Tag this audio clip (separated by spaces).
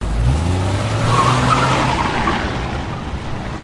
spin spinning wheel car